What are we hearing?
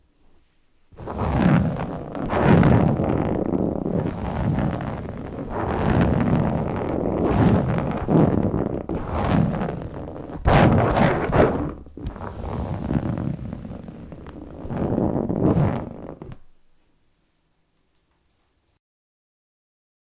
Scratching on a chair